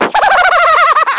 Hyper Laugh
This is me recording my voice.
THIS IS ACTUALLY MY VOICE. I took it from a random audio I recorded in my Gionee G3 cellphone and cropped it in Audacity. I used a voice changer app (I forgot the name) to edit the voice.
cartoon, chipmunk, funny, hyper, laugh, voice